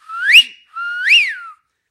for WIM
WIM wanted someone to post a sample of what a very beautiful woman would sound like... this is the first thing that came to mind.
human, whistle